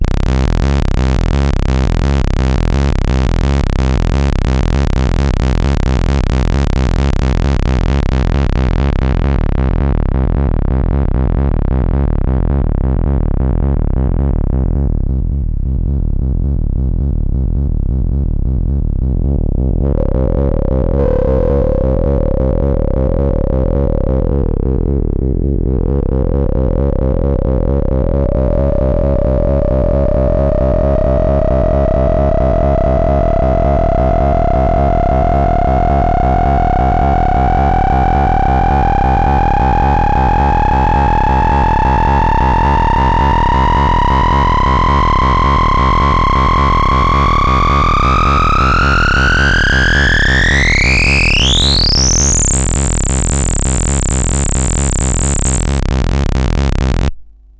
DIY SYNTH DEMO 1
Some clips of my diy analog synth on the build
adsr, analog, components, discrete, electronic, envelope, hardware, homemade, music, synthesizer, vca, vcf, vco